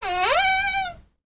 Grince Plac Lg Md-Hi
a cupboard creaking
creaking, horror